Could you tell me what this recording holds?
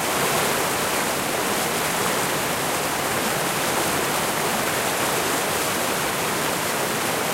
This is a short looping version of
that I'll be using in a videogame called Owlboy.